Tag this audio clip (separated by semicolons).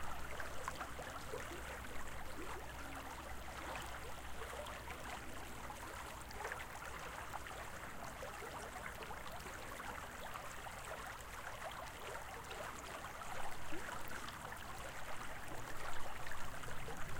natural; water; nature